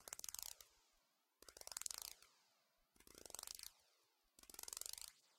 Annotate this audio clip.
alien language
I used a pill bottle and the lids child protective portion to make a noise, then added effects.
martian, unreal, aliens